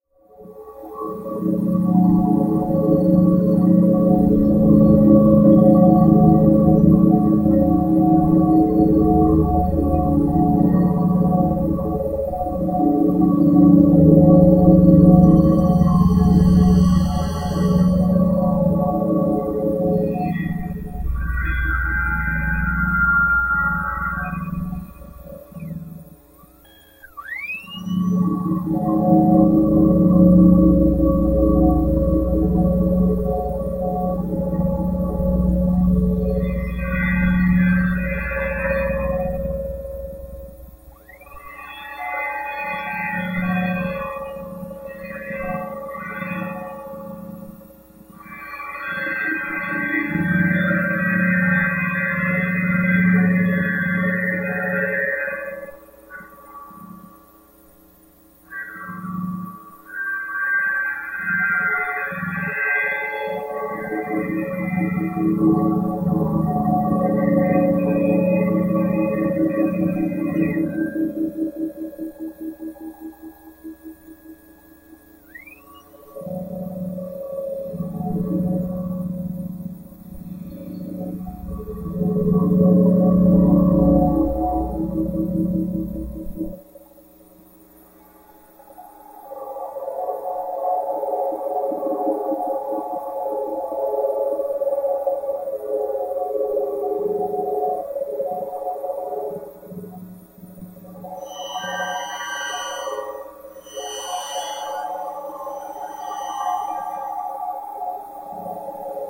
Noise reduction of aeolian guitar played with hair drier